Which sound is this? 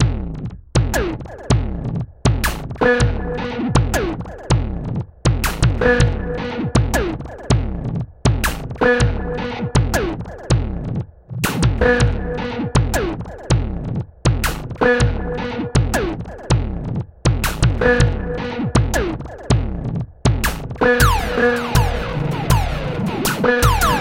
80 bpm Dirty 23rd Century Attack loop 7
ATTACK LOOPZ 01 is a loop pack created using Waldorf Attack drum VSTi and applying various Guitar Rig 4 (from Native Instruments) effects on the loops. I used the 23rd Century kit to create the loops and created 8 differently sequenced loops at 80BPM of 8 measures 4/4 long. These loops can be used at 80 BPM, 120 BPM or 160 BPM and even 40 BPM. Other measures can also be tried out. The various effects go from reverb over delay and deformations ranging from phasing till heavy distortions.
4, 80bpm, drumloop